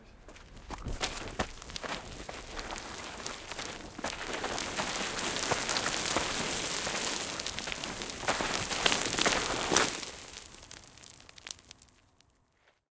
Trying to walk horizontal on a steep slope. Gravel and pebbles faling and rolling. Close perspective, overwhelming!
dirt, dust, falling, footsteps, gravel, pebbles, rolling